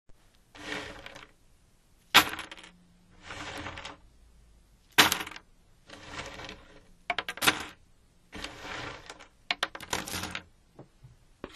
Jigsaw puzzle pieces being dropped onto a table
cardboard, drop, bounce, rattle, puzzle, pieces, jigsaw